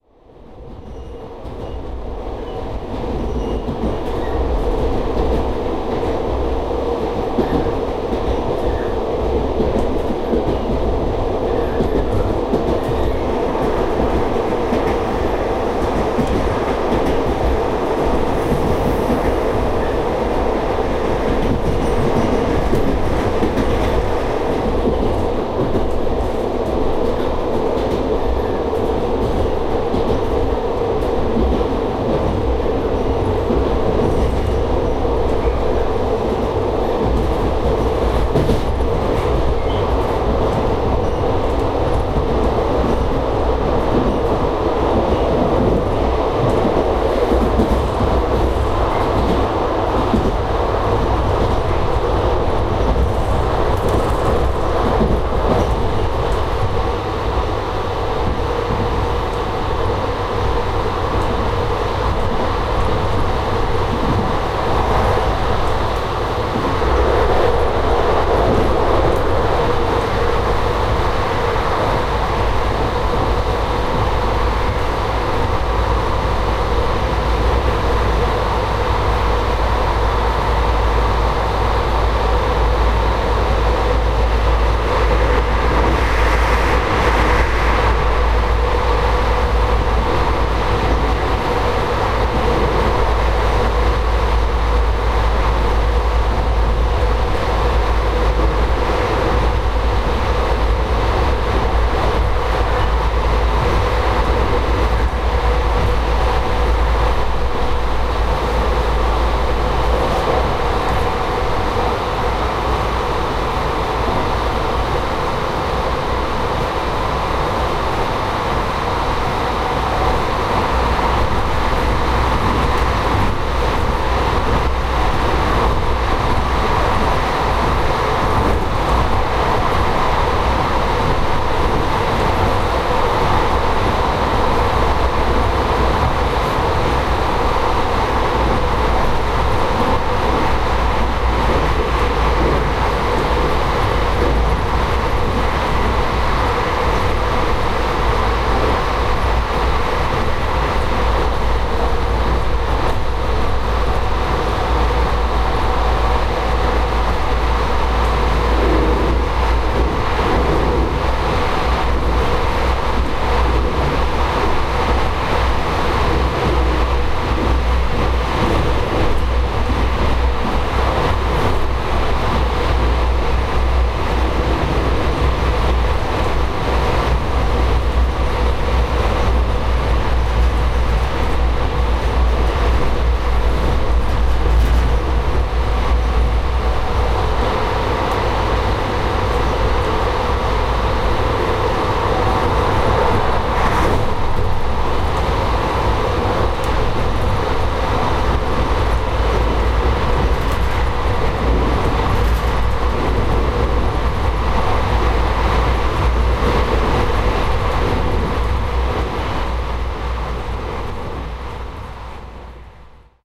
Field recording of a train ride in the western outskirts of Paris. I wanted to capture what passengers actually hear when they take that train.
bombardier, field-recording, France, TER, train, train-ride